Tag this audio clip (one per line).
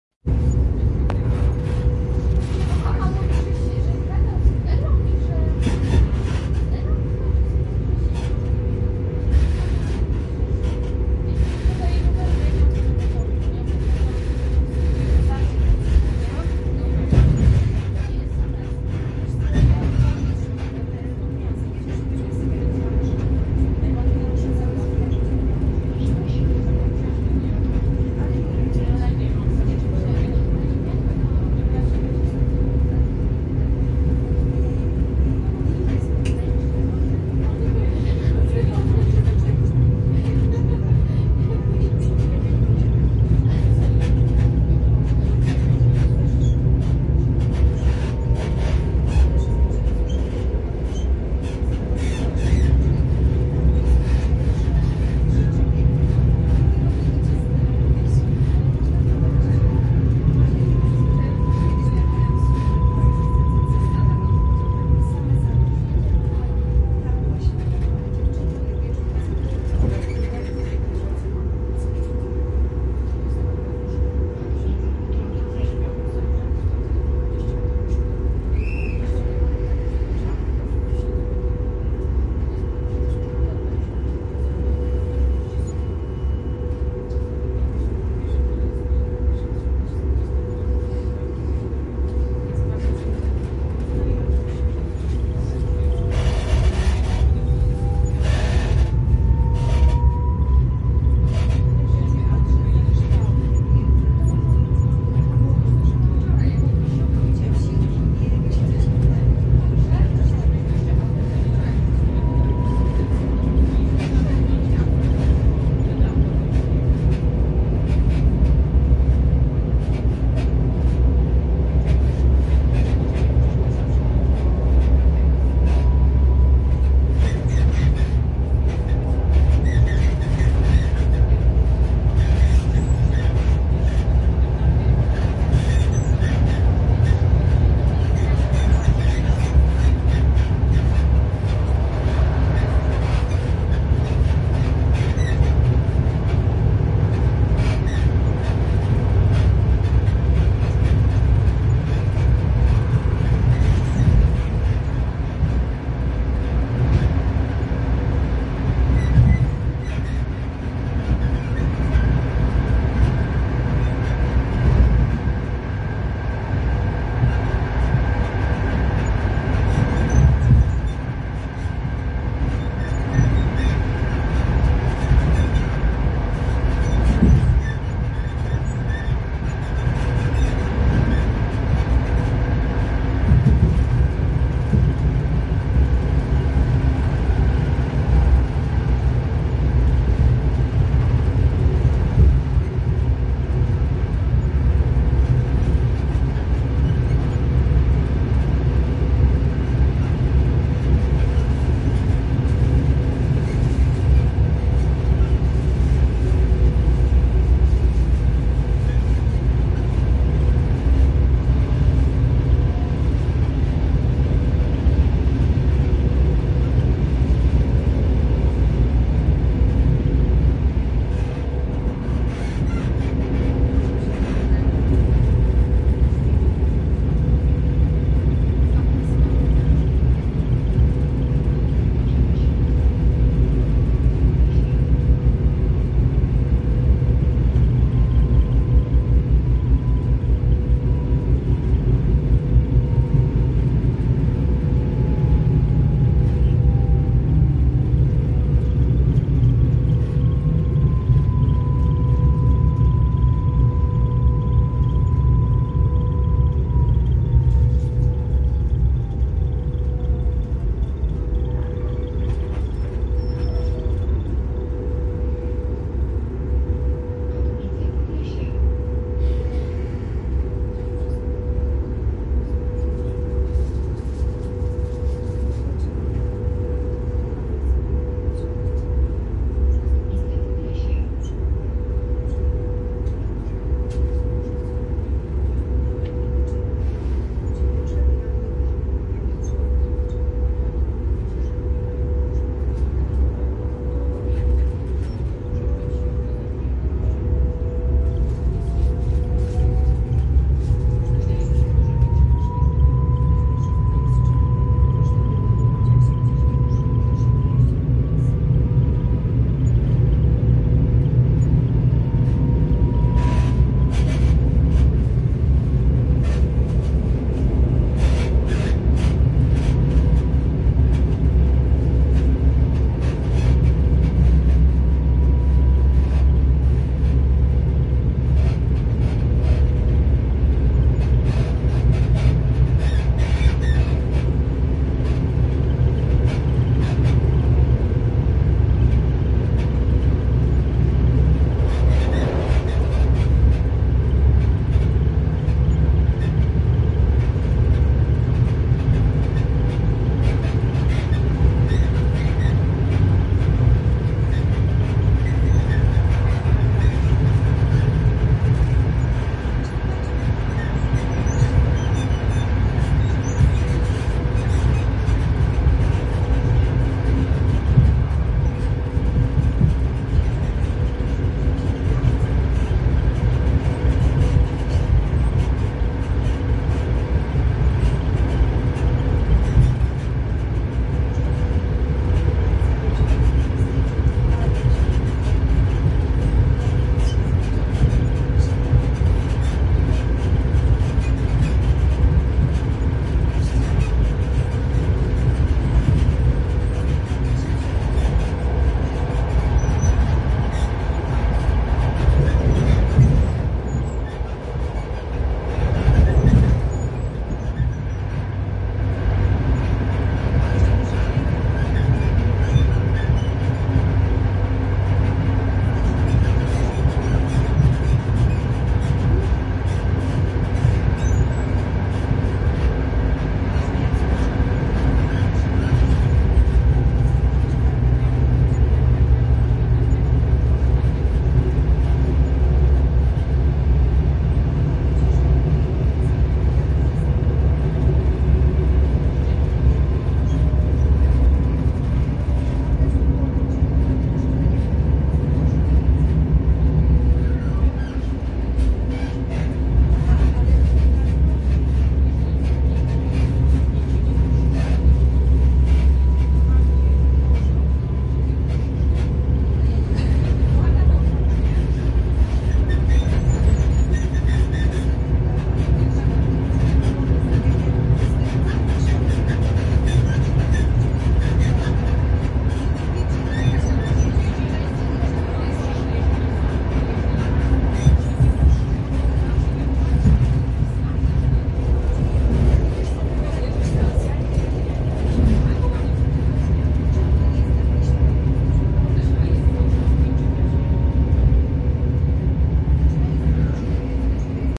journey
pkp
rail
railroad
rails
railway
train